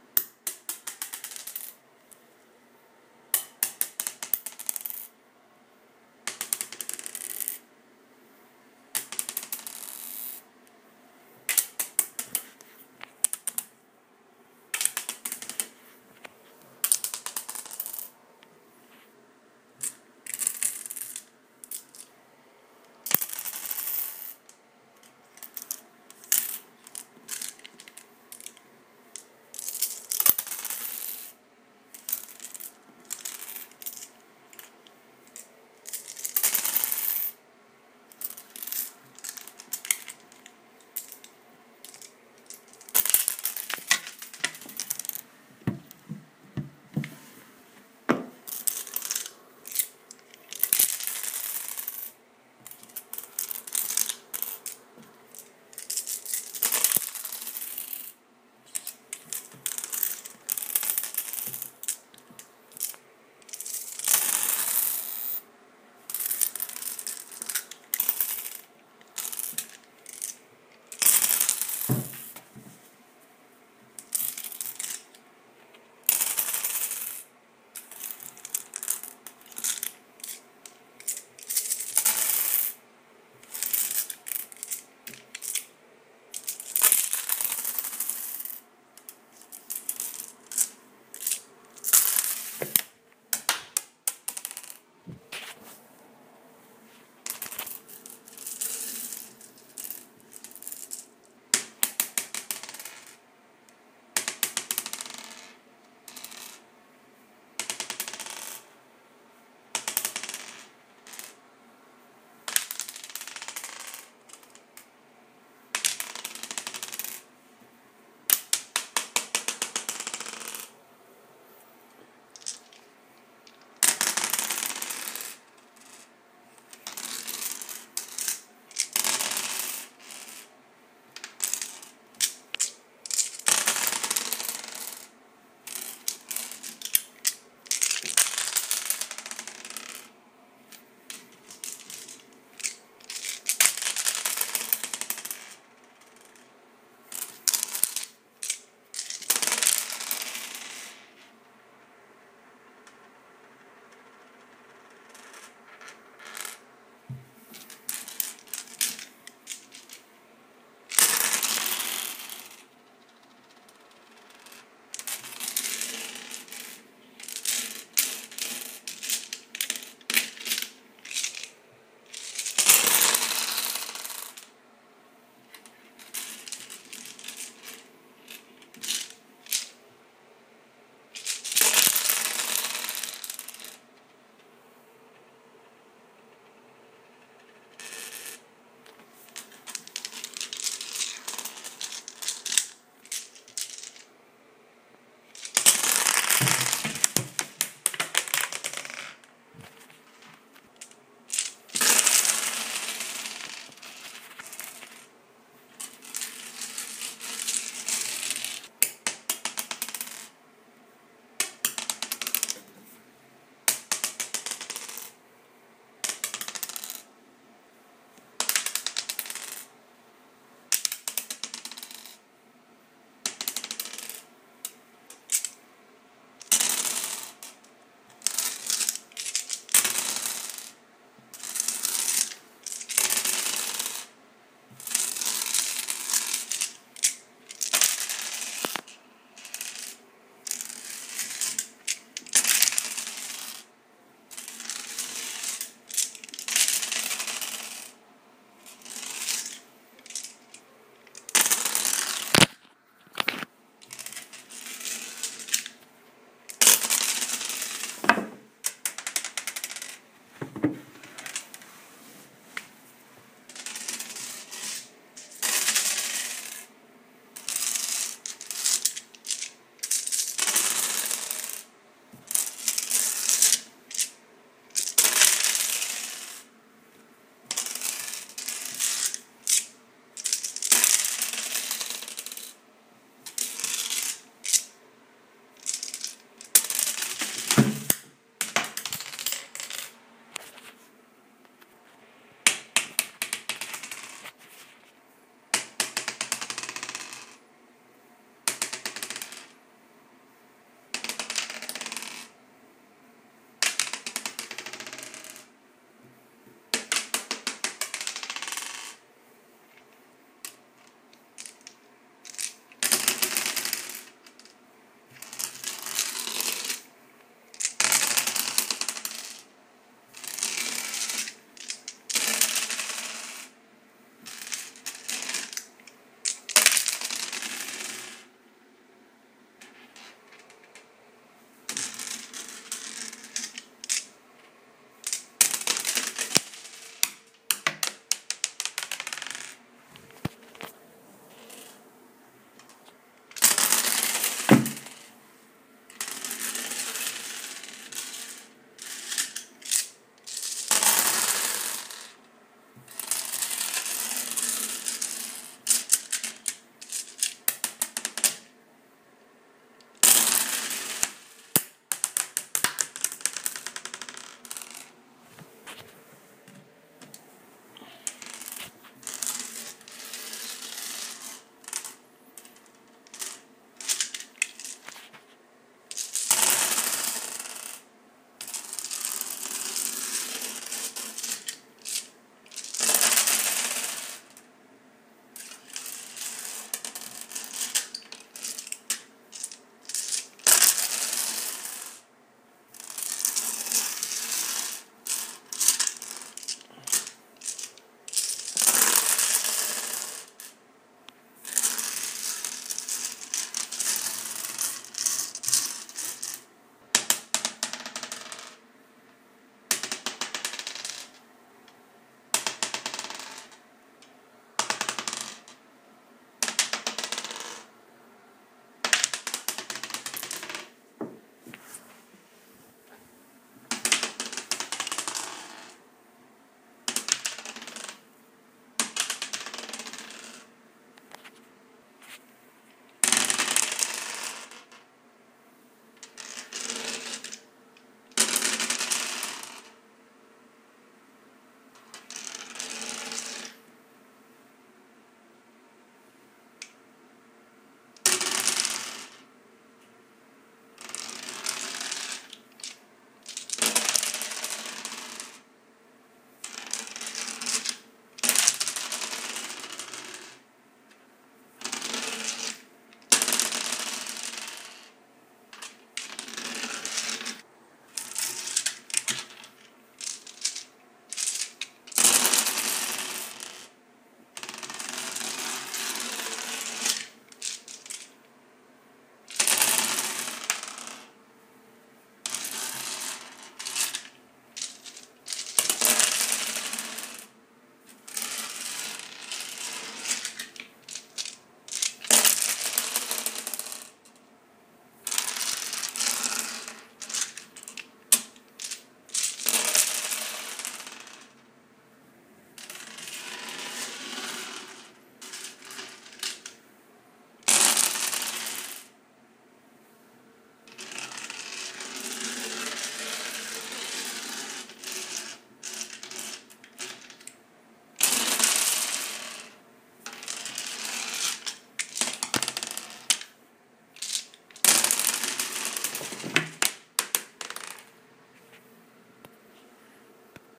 DiceRollingSounds Tile
This file contains the sounds of various dice rolling on a tile surface.
Dice rolling sounds. Number of dice: 1, 5, and 10+ samples. Type of dice: d2 (coin), d4, d6, d8, d10, d12, d20, d100 (two d10's). Rolling surfaces: wood, tile, and glass.
d10, d100, d12, d20, d4, d6, dice, die, game, roll, rolling, rpg, throw, throwing